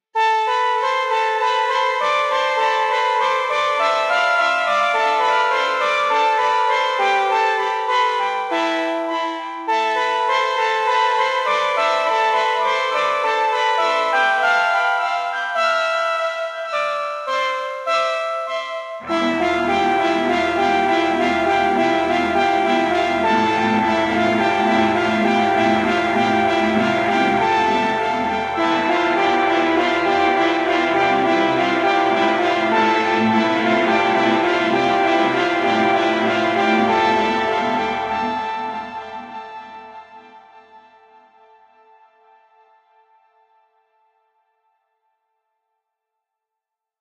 Short vignette meant to invoke a mixed sense of adventurous curiosity with a sinister undercurrent for apprehensive tension. May be used for short film or video game soundtracks as long as the artist, Komit, is credited for the bit of sound used. You don't have to ask me personally for permission to use it, as long a credit is given. Thank you